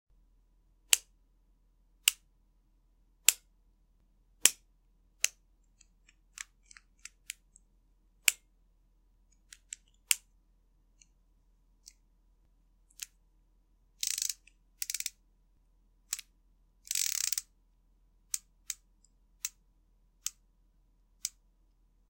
Tool Clicks
A tool clicking into place and moving